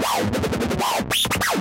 Hard screech made with sylenth1.